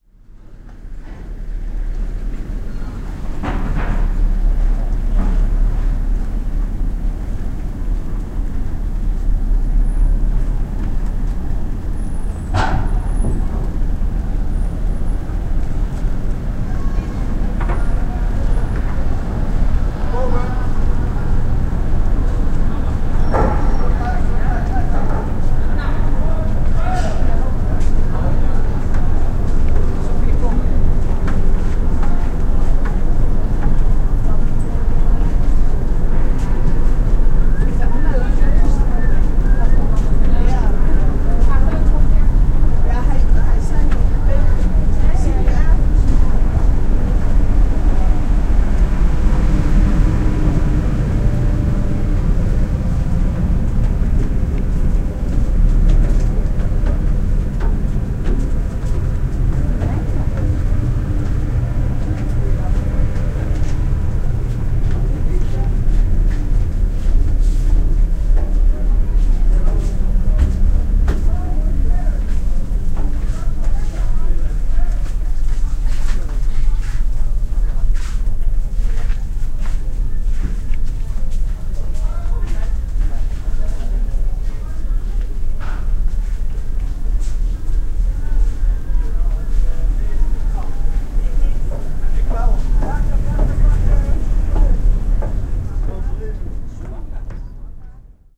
20070624 082311 haven vetrek java
On a ferry to Bali in the Harbour at Java, Indonesia.
- Recorded with iPod with iTalk internal mic.
ferry, field-recording, indonesia